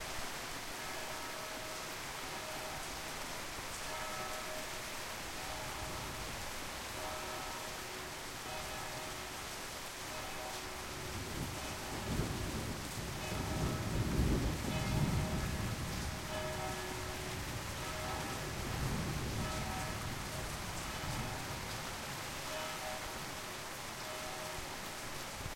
rain and bells2
rain and bells
rain, bells